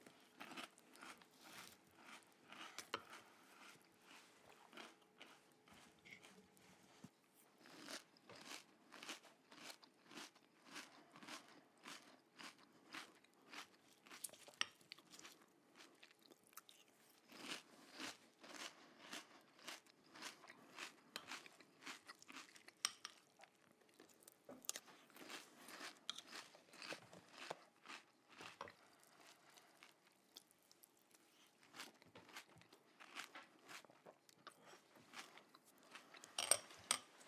Mastication-Cereales
Eating cereals recorded on DAT (Tascam DAP-1) with a Rode NT4 by G de Courtivron.